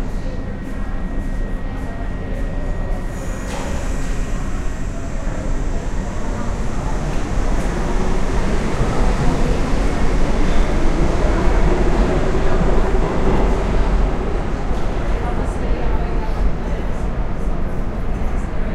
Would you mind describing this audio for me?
Subway Platform Noise with Train Pulling Away on Otherside
city, field-recording, new-york, nyc, platform, public, station, subway, train, underground